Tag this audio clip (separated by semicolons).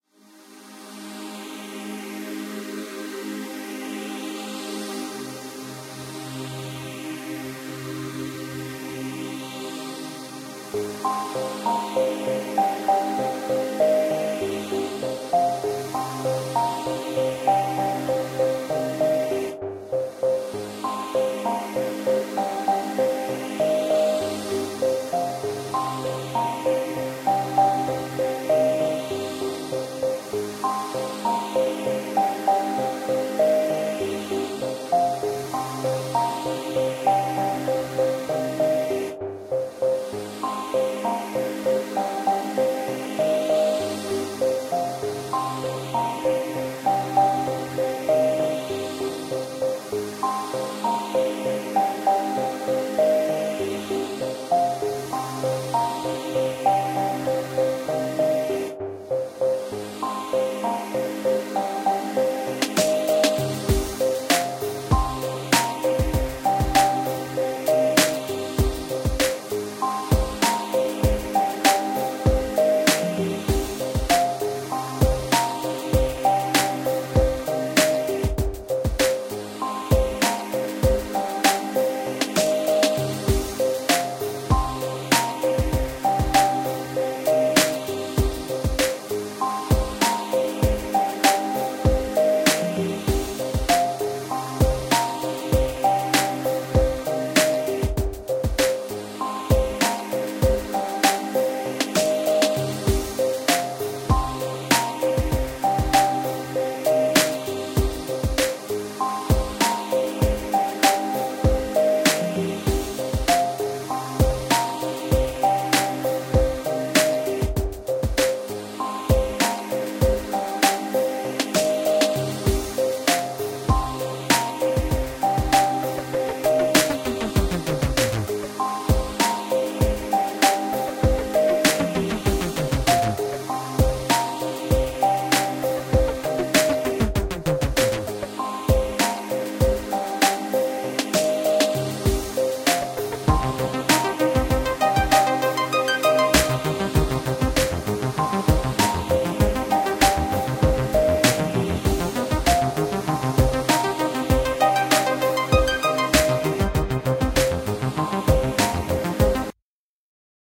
Free; Hip; Hop; Melody; Music; Sound